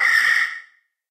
some screech sound.
glitch, piercing, lo-fi, electronic, noise, harsh, screeching